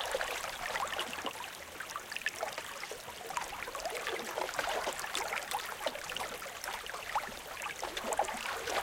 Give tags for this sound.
bubble; flow; liquid

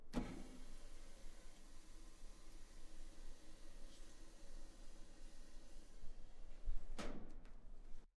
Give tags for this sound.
fountain; water

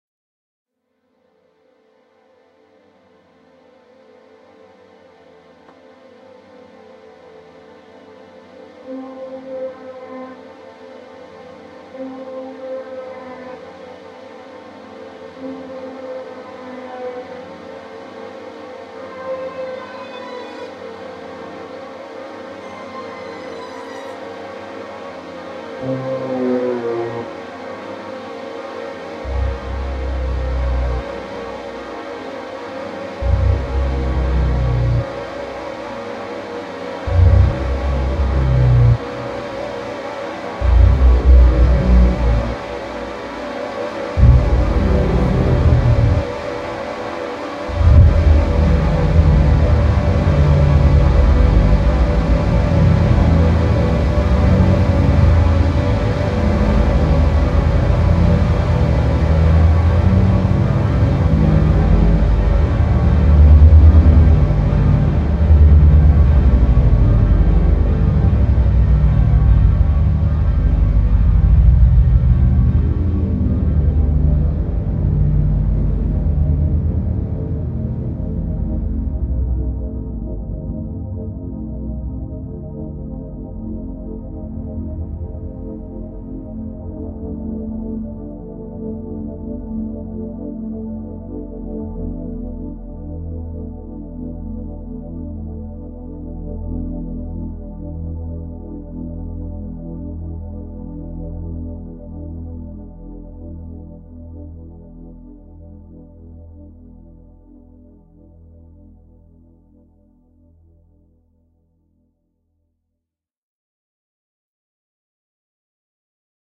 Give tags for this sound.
Horror; Edgy; Short; chilling; Alex; Dark; Thriller; Score; Instrumental; Film; Duncan; unnerving

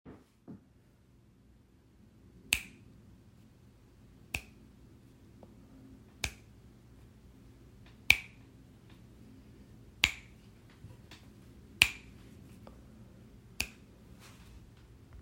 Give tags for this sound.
pop; water; yay

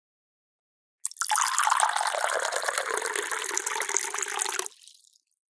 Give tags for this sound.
filling; jar; water

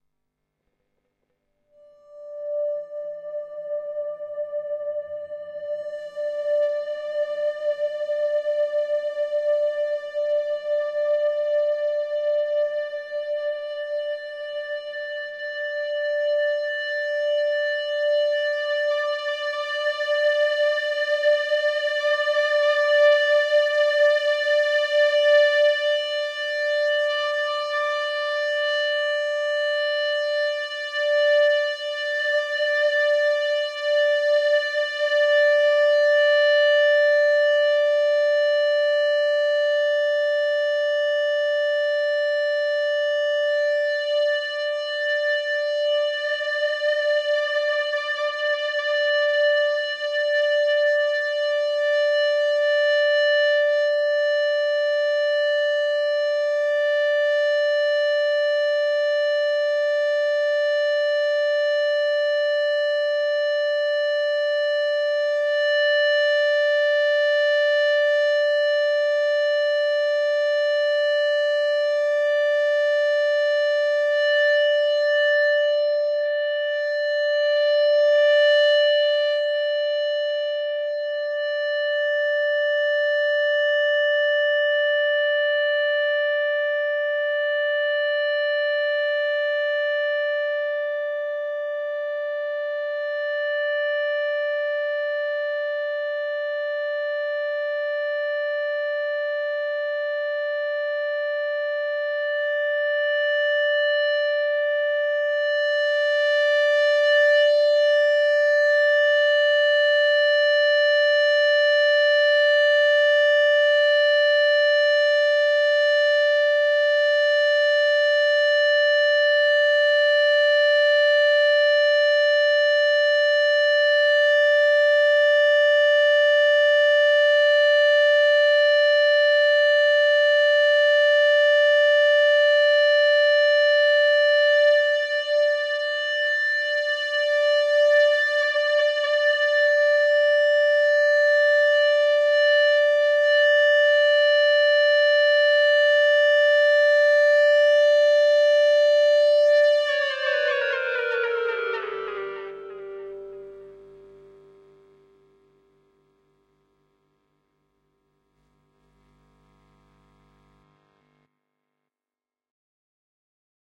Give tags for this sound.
drone; EBow; G-minor; guitar; sustained-note

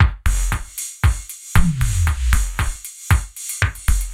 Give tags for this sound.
116bpm; beat; club; dance; electro; electronic; house; loop; rave; techno; trance

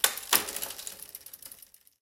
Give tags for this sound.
bicycle; bike; click; gear-shift

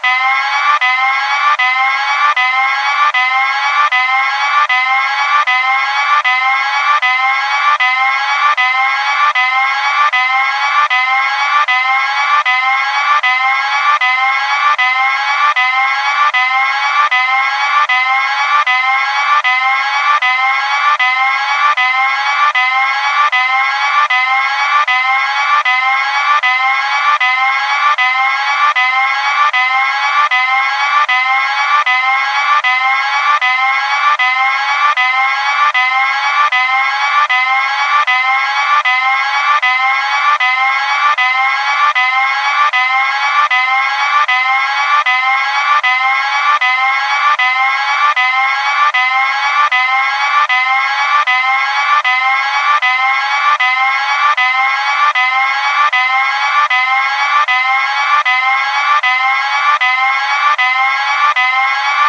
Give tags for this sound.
alarm; loop

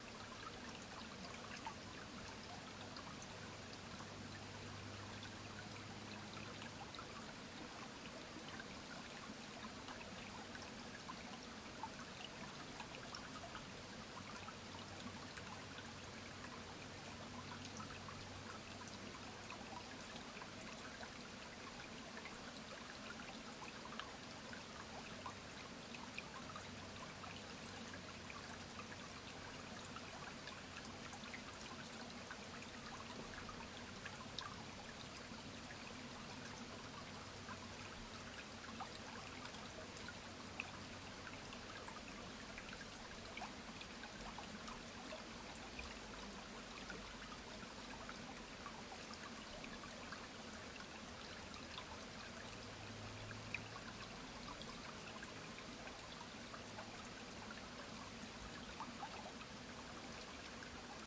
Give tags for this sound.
Small; field-recording; river; field; water

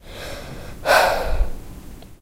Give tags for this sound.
campus-upf; UPF-CS14

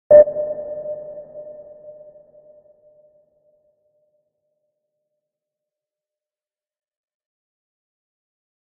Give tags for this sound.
sub; water; fx; high; submarine; sonar; processed; aquatic; under-water

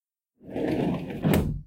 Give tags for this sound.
campo; celular; de; grabacion

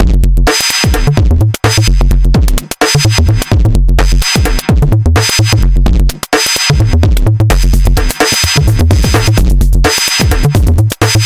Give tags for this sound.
abstract; deep; elektro; dance; filter; drum; breakbeats; heavy; funk; fast; electro; drum-machine; dj; downbeat; downtempo; reverb; experiment; beat; club; hard; loud; powerful; distorsion; producer; phat; percussion; bassline; loop; processed; idm

